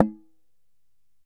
Striking an empty can of peanuts.